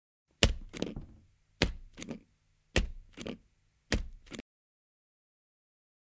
The click of a staple.Recorded using a shotgun mic hooked up to a camera.
staple, sound